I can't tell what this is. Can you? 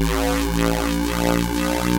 Square wave rising from A to slightly sharp with some modulation thrown in rendered in Cooldedit 96. Processed with various transforms including, distortions, delays, reverbs, reverses, flangers, envelope filters, etc.